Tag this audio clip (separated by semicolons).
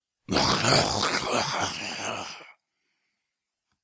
flesh; snare; horror; snarl